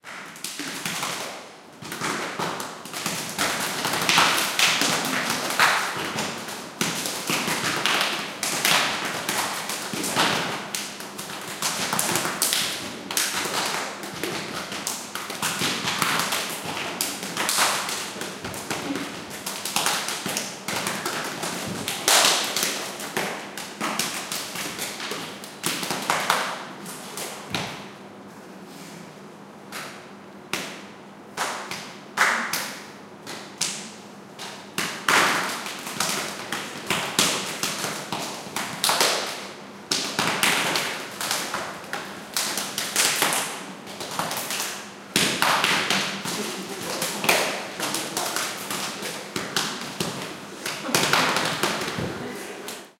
Slapping, ShangART, BMspace, Art Taopu, Shanghai, China
Monganshan, Shanghai, improvisation, percussion, clap, Chinese, hand, smack, whack, ShangART, impact, hit, finger, jive, China, sound, slap, performance, Taopu, BMspace, slapping, hands, clapping, field-recording, workshop, Art, percussive